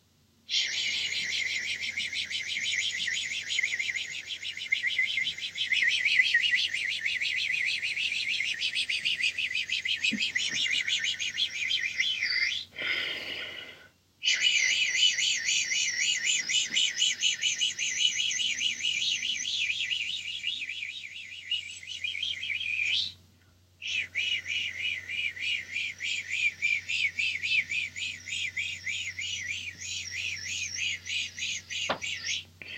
Cartoon Spinning (3x)
Three takes of me making a funny spinning noise.